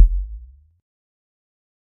matching with the snare in this pack, they both sound kinda sad, and they belong togheter.
kick, synthetic